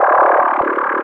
Robot Transform
A robot changes from one guise to another
Machine, Robot, Science-Fiction, Sci-Fi, Transform